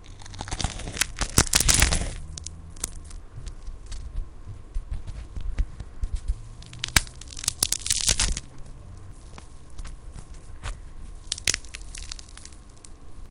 Bone slowly being broken
I made this by mixing a few sounds of me breaking a rice cake into bits.